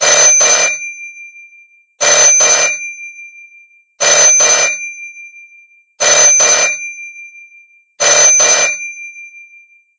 Edited in Audacity to be fully loopable. The different versions of this sound are of varying lengths.
706, 80341, bell, bt, hyderpotter, phone, ring, ringing, ringtone, telephone